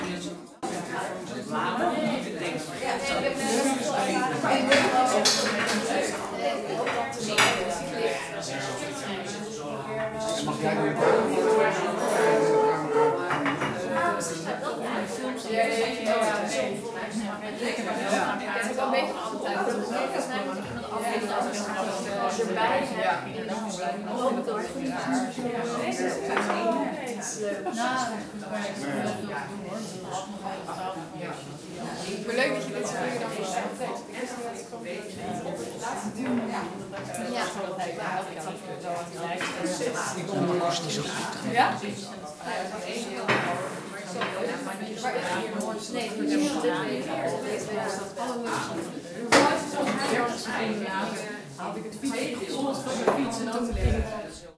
people walla talking intern dutch chairs
Zoom h4n X/Y stereo recording of Dutch crowd talking (internal).
ambiance, ambience, ambient, atmos, atmosphere, background, background-sound, dutch, field-recording, general-noise, holland, inside, internal, netherlands, people, soundscape, talking, walla